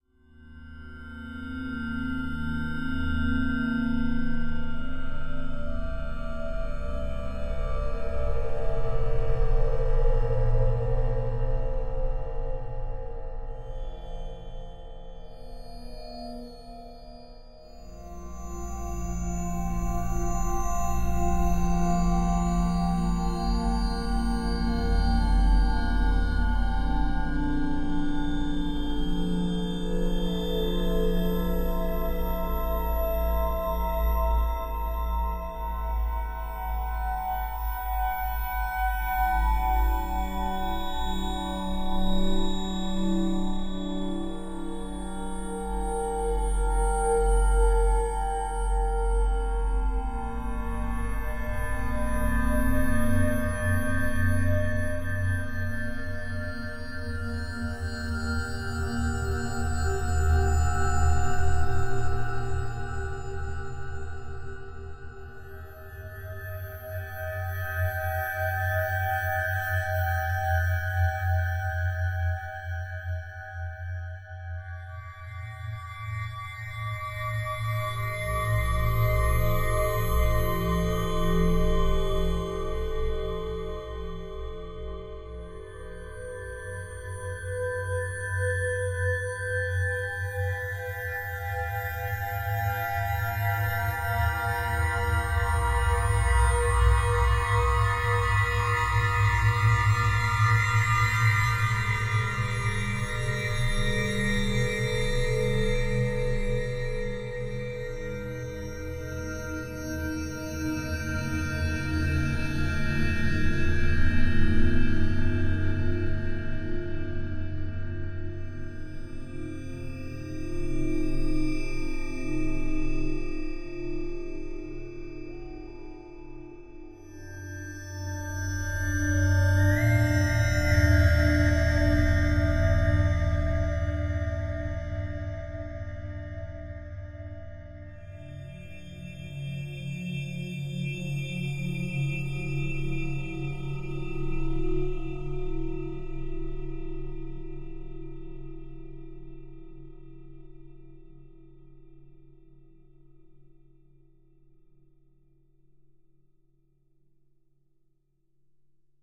XMGB3 (Cross Modulated Ghost Bell 3)
The source material for this abstract soundscape was a metallic bell sound, processed with granular synthesis and other indignities.
processed, atmosphere, drone, soundscape, Padshop, ambience, synth, sci-fi, electronic